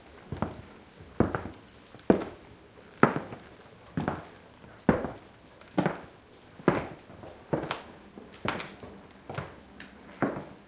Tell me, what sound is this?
Footsteps on wooden floor. Recorded inside a large wooden goahti at Ylläskaltio hotel in Äkäslompolo, Finland.